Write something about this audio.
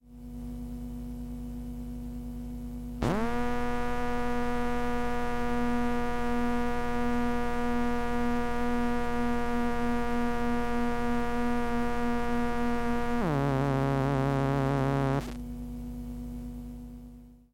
Tascam 246 (FF - STOP)
Induction coil pickup recording of a Tascam 246 four track cassette recorder. Recorded with a Zoom H5 portable recorder and a JrF Induction Coil Pick-up
fx, experimental, electronic, cassette